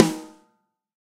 DYRP SNARE 002
Snare drums, both real and sampled, layered, phase-matched and processed in Cool Edit Pro. These DYRP snares were created for a heavy rock / metal track.